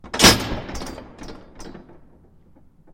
Door Shut Near
A door sound effect recorded for Intermediate Sound 2017.
Recording Credit (Last Name): Winner